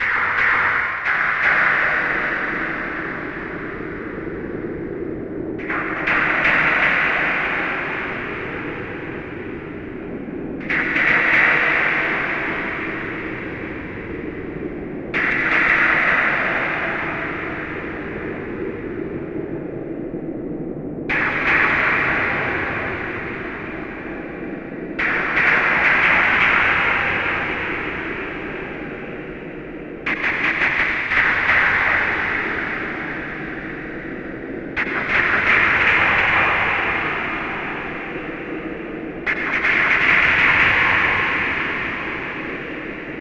These amazing space FX thunderclap sounds were created in Ableton Live, using a signal chain involving a feedback loop.
The original sound was me clapping my hands or snapping my fingers.
The was captured by a cheap webmic, passed through a noise gate and fed into Destructonoid (a VST audio triggered synth by RunBeerRun), then the signal goes through ComputerProg (a sequenced gate VST by RunBeerRun) a flanger and a delay echo then to the sound output.
A feedback loop picks the signal up after the delay mentioned above, adds a second 100% wet delay at 4/120, feeds into DtBlkFX (set to some pitch shifting effect), then there is a gain boost and the signal is fed back to the audio input of Destructonoid.
Wonza !